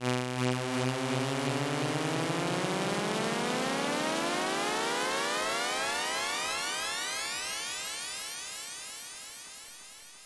chargeuplong1-chiptone
Can be used in charging a weapon in a video game.
8-bit, 8bit, arcade, chip, chippy, chiptone, game, lo-fi, retro, vgm, video-game, videogame